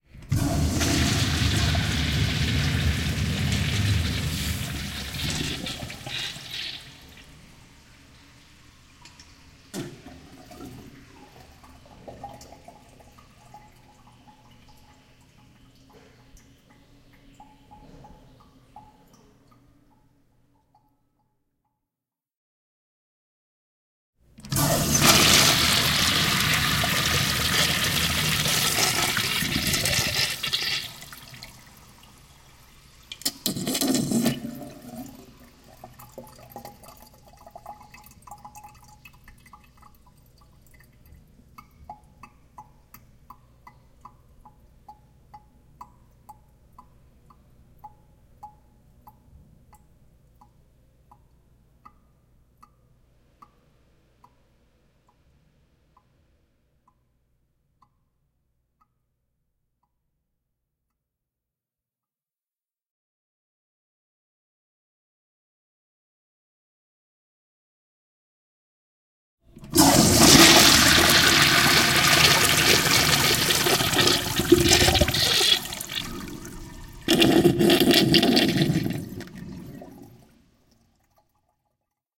urinal flush roomy aimed at wall, closeup, and super-closeup
close, flush, roomy, urinal